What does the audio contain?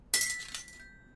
20-Vidrio Rompiendose 2
Crashing glass noise
glass, noise, crash